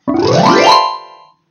Sound Effect Magic
A little sound effect I did.
I used a Casio CT-470 keyboard to do the sound effect.
effect, magic, sfx, sound, wand